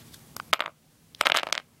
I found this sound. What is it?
wood impact 12
A series of sounds made by dropping small pieces of wood.
hit, wooden, crash, impact, block, drop, wood